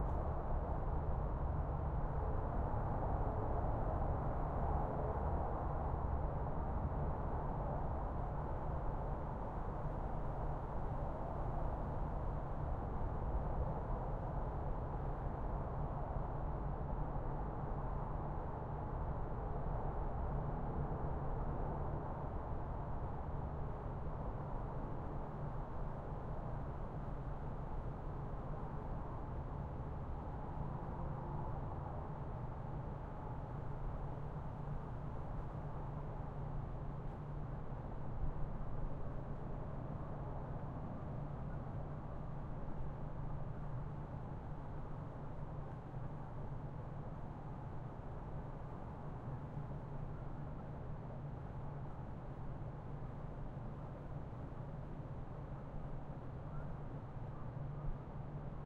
skyline distant highway far haze from campground night2
highway, night, skyline, haze, from, campground, distant, far